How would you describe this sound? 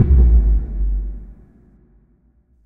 ss-flutterthunder
A low ambient bass hit - distant bomb hit
electronic, crash